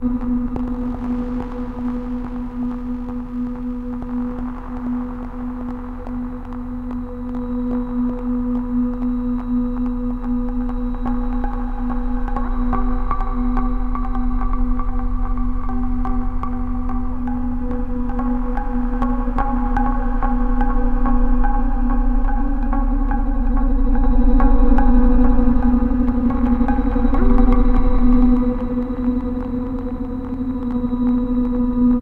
sort of glitched suspense ambiance
ambience, ambient, atmosphere, backgroung, drone, electro, experiment, film, glitch, illbient, pad, scary, sci-fi, score, soundscape, soundtrack, suspense, tense, tricky